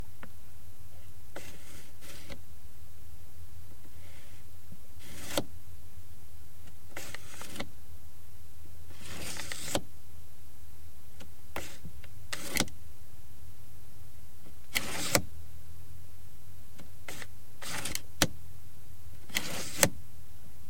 010-HVAC-ACSliderClose
The Air Conditioning slider on a Mercedes Benz 190E, shot with a Rode NTG-2 from 2" away.
dial, fader, knob, photo, preview, sessions, slider, test